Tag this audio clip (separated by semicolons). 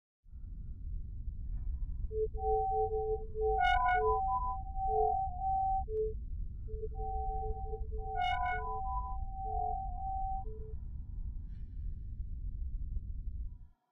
beeps; drone; future; sci-fi; cockpit; space; beeping; spaceship